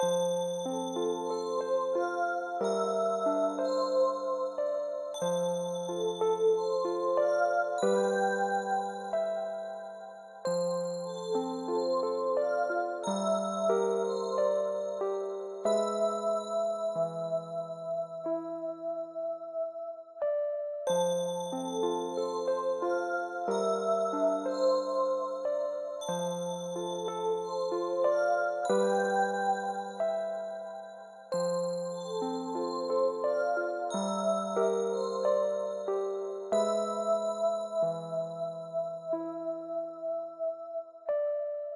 F maj soft intro
F major 16 bar loop, 92 BPM, elec piano and synth